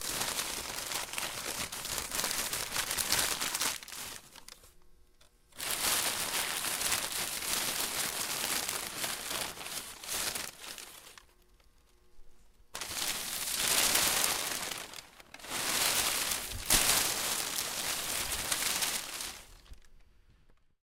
Rustling Packing Paper
Rustling around and crushing packing paper.